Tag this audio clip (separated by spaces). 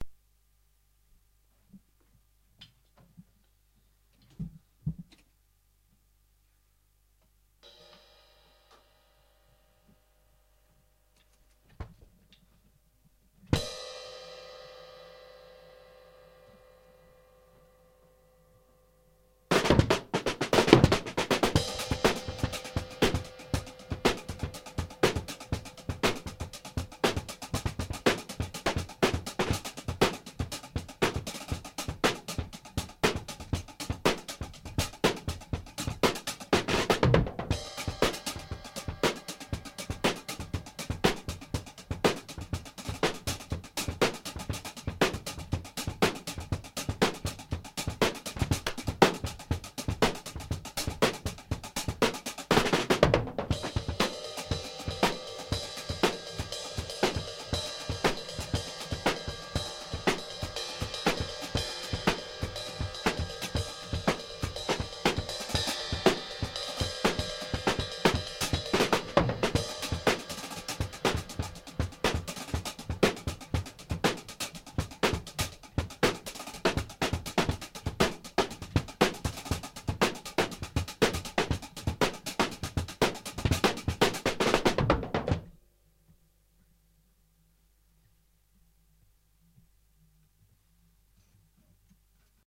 ace bournemouth download drum free funk jazz london manikin producer robot samples shark space time